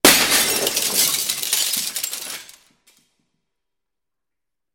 Windows being broken with vaitous objects. Also includes scratching.